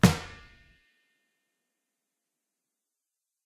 cheap plastic toy football or beach ball, impact on concrete.